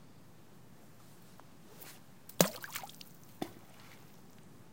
Rock In Water Splash-Plop 2
Throwing rocks into the calm river water.
Early morning, February 21 near Clark Fork River.